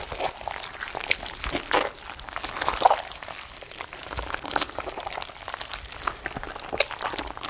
Pumpmkin Guts 6
Pumpkin Guts Squish
pumpkin, guts